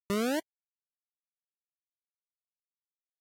Classic 8-bit jump sound. you can use it in your games!
videogame, game, chiptune, retro, video-game, arcade, nintendo, jump